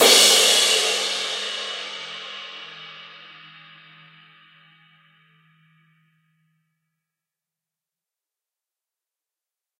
Skiba24Edge
A custom-made ride cymbal created by master cymbal smith Mike Skiba. This one measures 24 inches. Recorded with stereo PZM mics. The bow and wash samples are meant to be layered together to create different velocity strikes.
cymbal, drums, stereo